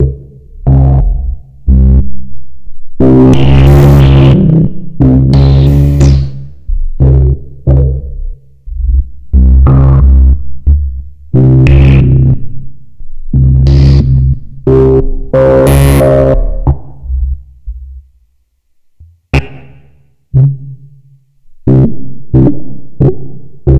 NordSyncRandCharge120BPMPhrase
Harsh, dark, evolving effect loop based on syncing oscilators to a 120 BPM clocked random LFO in a Clavia Nord Modular synth.
bleep, synth, loop, evolving, distorted, harsh, clavia, 120bpm, dark, fx, slow, modular, nord